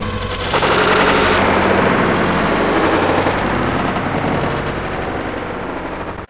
An Allosaurus roar. Can also be used as a T-Rex roar, a monster roar, zombie roar, or the Hulk's roar. Tags:
edited dinosaur roar dinosaur-roar roar-dinosaur dino dino-roar monster creature sci-fi science-fiction science fiction history old terror horror scary t-rex-roar tyrannosaurus tyrannosaurus-roar t-rex allosaurus allosaurus-roar
allosaurus, allosaurus-roar, creature, dino, dino-roar, dinosaur, dinosaur-roar, edited, fiction, history, horror, monster, old, roar, roar-dinosaur, scary, science, science-fiction, sci-fi, terror, t-rex, t-rex-roar, tyrannosaurus, tyrannosaurus-roar